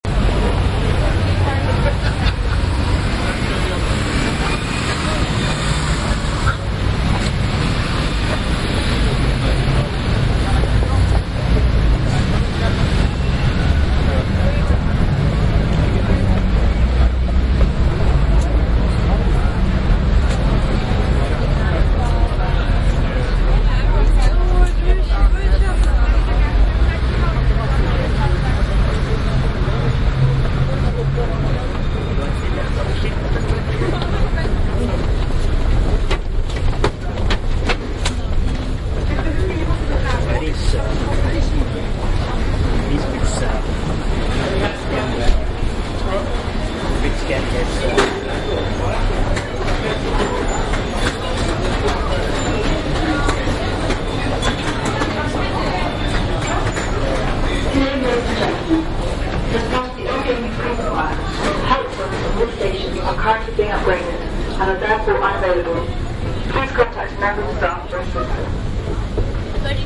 Oxford Circus - Crowds by Station